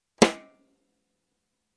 samples in this pack are "percussion"-hits i recorded in a free session, recorded with the built-in mic of the powerbook
drums, rim, rim-shot, snare, unprocessed